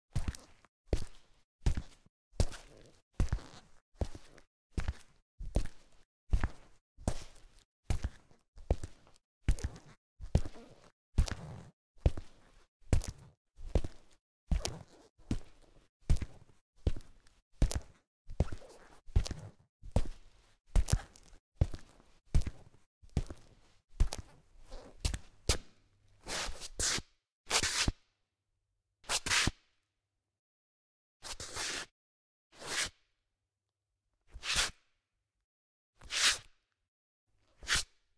footsteps concrete
Slowly walking on a concrete floor wearing leather shoes.
EM172 (on shoes)-> Battery Box-> PCM M10.
male, slow, Foley, slowly, footsteps, walking, concrete, walk, footstep